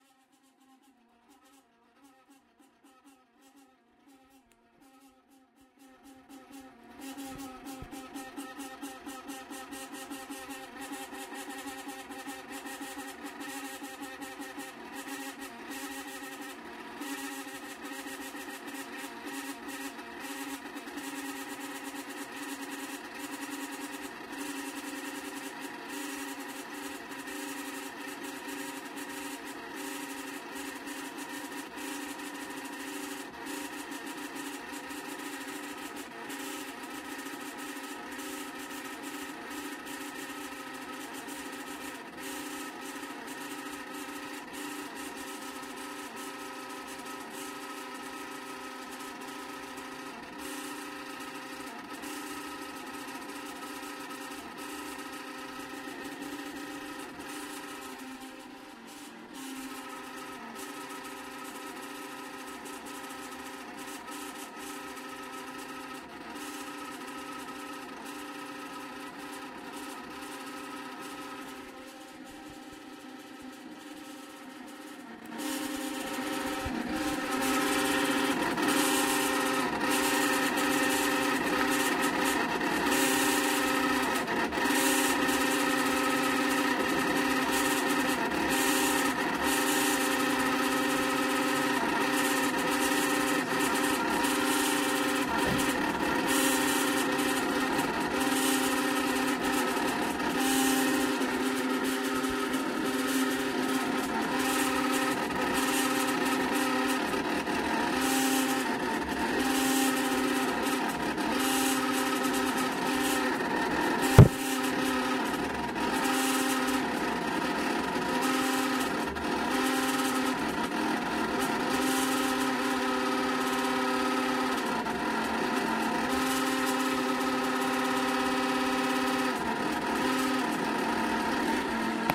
Rusty Fan
A washroom fan that's seen better days. Recorded with a ZOOM H2N.
Annoying, Fan, Bathroom, rusty